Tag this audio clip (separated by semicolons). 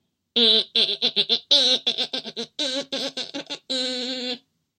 looney-tunes; funny; insect; cartoon; vocal; mel-blanc; fanfare; ant